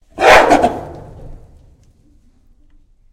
The roar of an alpha gorilla.
Recorded in a zoo in middle Germany.